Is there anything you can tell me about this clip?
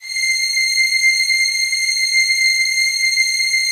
15-synSTRINGS90s-¬SW
synth string ensemble multisample in 4ths made on reason (2.5)
c6, multisample, strings, synth